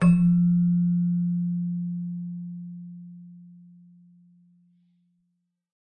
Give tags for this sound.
chimes
celesta

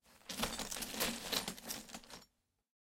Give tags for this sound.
crawling
glass
sound-effect

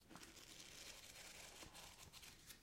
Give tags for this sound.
Dare-9
scrolling
pages
paper
book
Zoom-H2

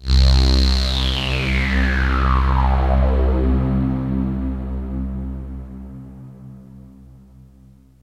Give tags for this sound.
bleep
buzzy
clavia
drop
filter
fx
low
modular
nord
sweep
synth

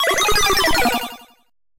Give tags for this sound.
FX
sound-desing
effects

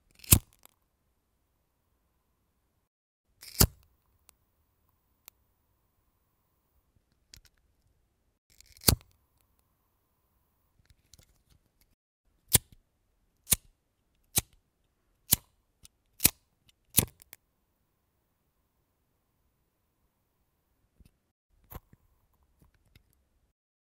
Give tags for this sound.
close-up
fire
flame
ignite
lighter
mechero

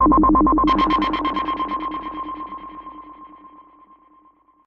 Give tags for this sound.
samples
remix

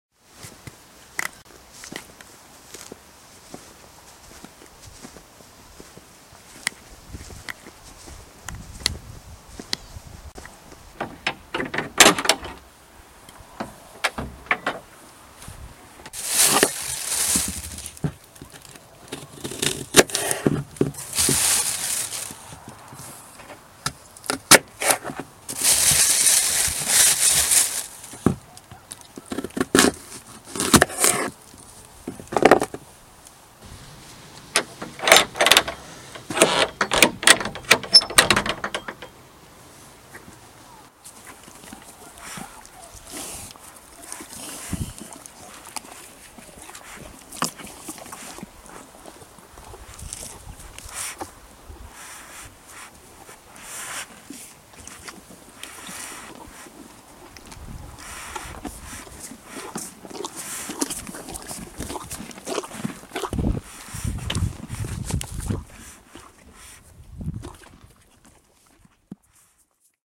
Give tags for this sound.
apples
horses
eating
door
cutting
Shed

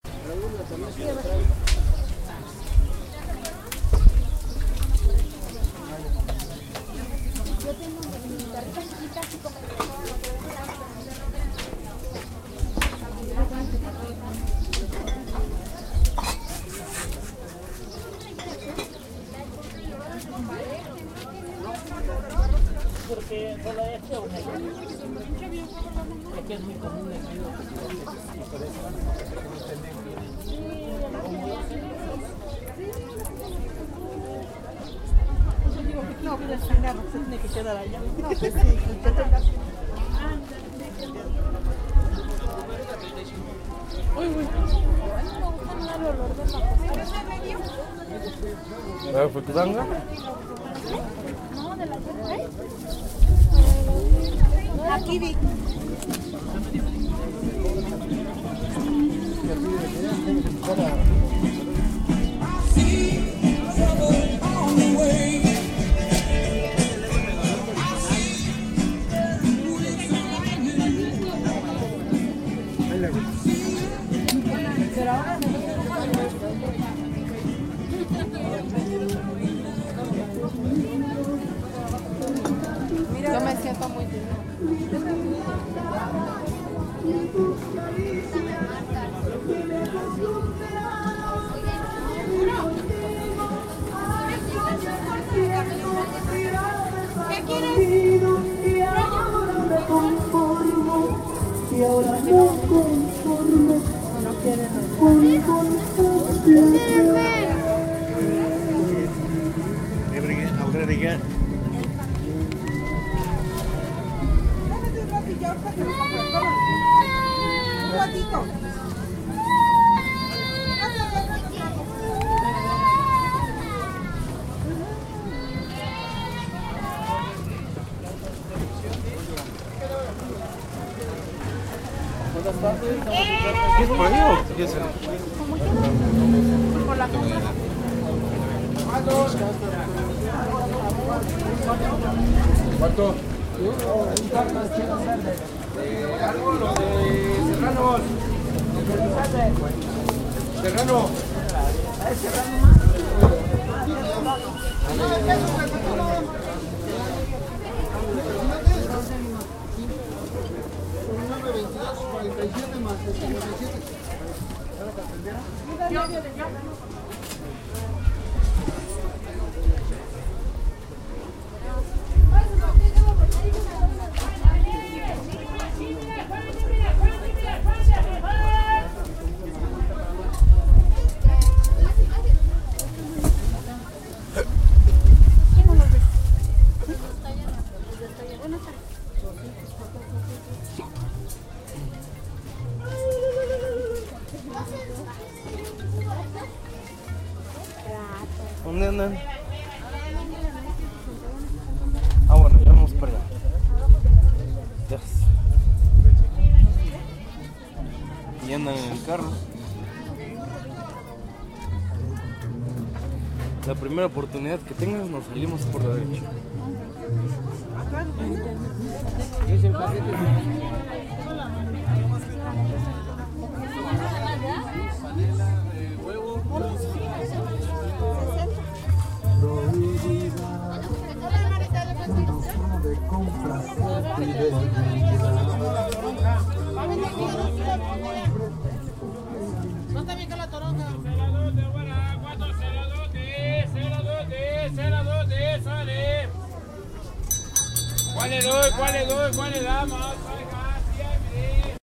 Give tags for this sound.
tianguis
calle
espa
gente
people
latin
mercado

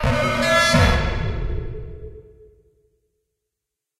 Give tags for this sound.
SlickSlack
feedback-loop
RunBeerRun
audio-triggered-synth
FX
Ableton-Live

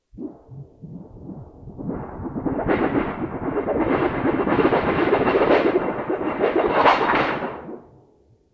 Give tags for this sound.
earthquake
metal

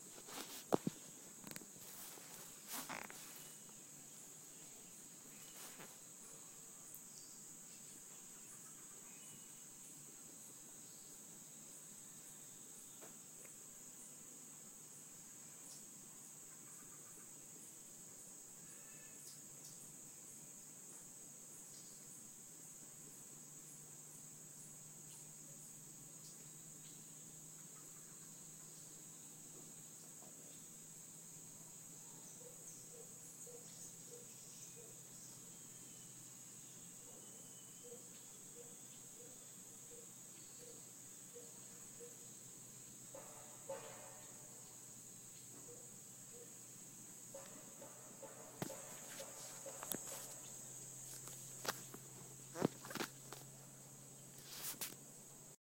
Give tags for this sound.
birds
chirping
costa-rica
field-recording
forest
insects
rain-forest

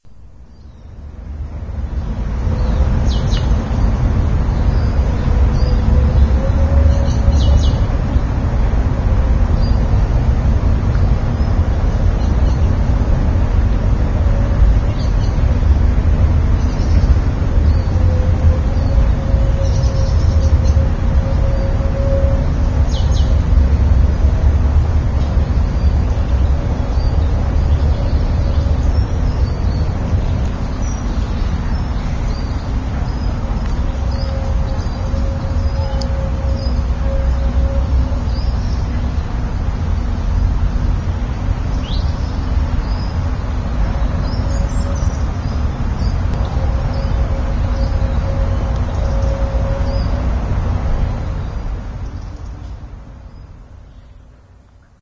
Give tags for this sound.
calm
environment
ulp-cam

